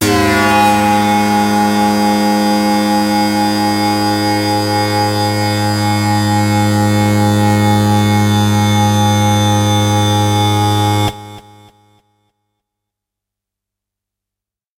Leading Dirtyness - G#2
This is a sample from my Q Rack hardware synth. It is part of the "Q multi 009: Leading Dirtyness" sample pack. The sound is on the key in the name of the file. A hard, harsh lead sound.
multi-sample, synth, electronic, harsh, lead, hard, waldorf